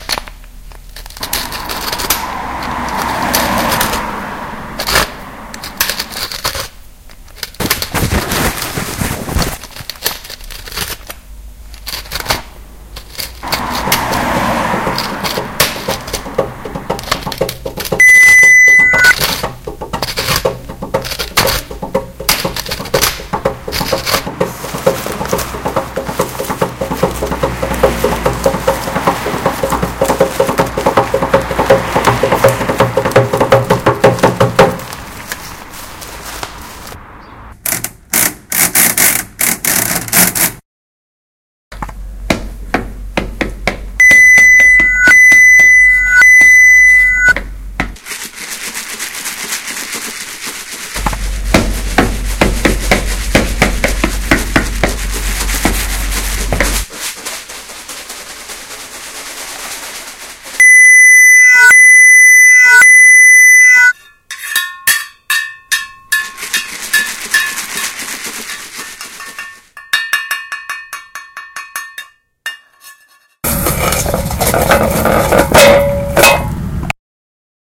SonicPostcard MB JasonAmadu
Here's the SonicPostcard from Jason & Amadu , all sounds recorded and composition made by Jason & Amadu from Mobi school Ghent Belgium
belgium, city, ghent, mobi, rings, sonicpostcards